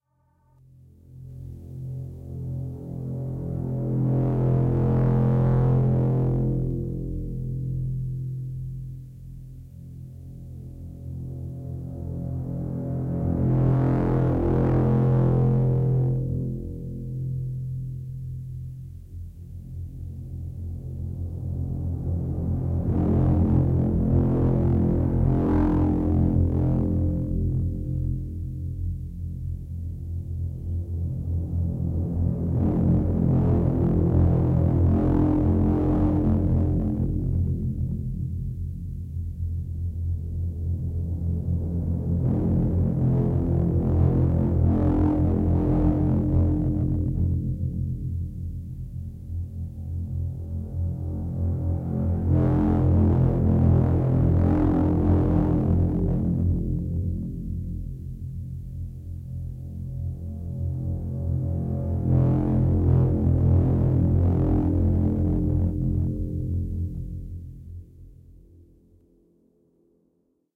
synthesizer sequence 48
synthesizer processed samples
sequence, synthesizer, transformation